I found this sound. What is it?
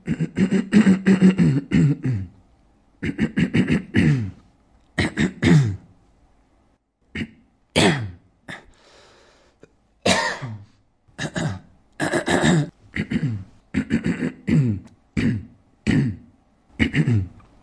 Hem & Throat-Clearing
Clearing my throat while reading a text.
Recorded with a Zoom H2. Edited with Audacity.
hem, ehm, aehm, cough, throat, clearing-throat, reading, throat-sound, speech, hemming, sick, ahem